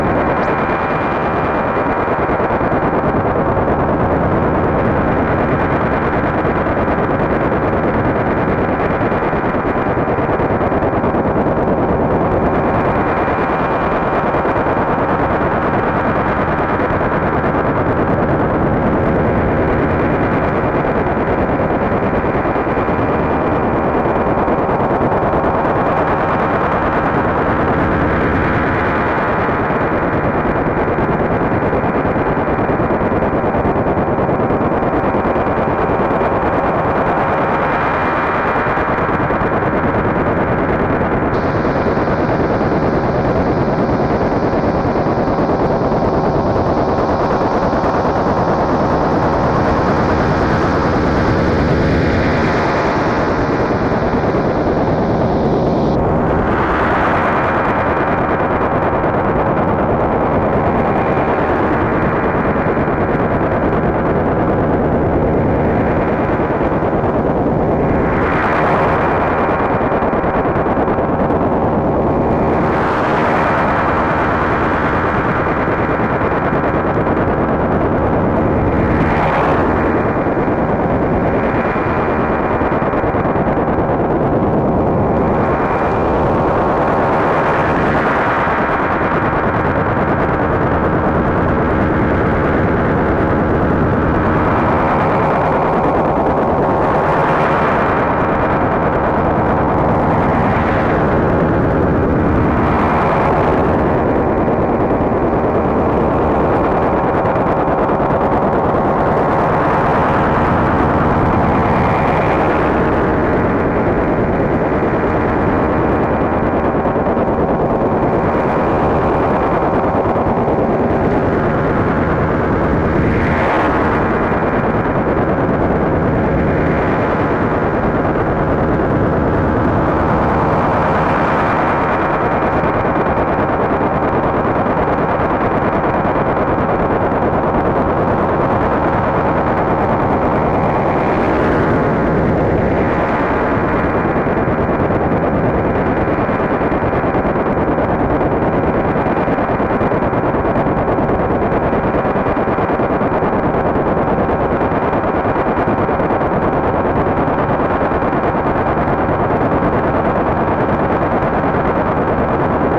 Probably another jamming radio station
interference, electronic, radio, shortwave, ham, jamming, noise